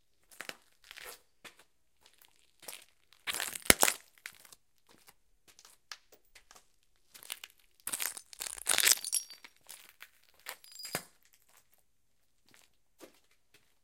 Me walking on a concrete patio on broken glass.
broken, concrete, glass, walking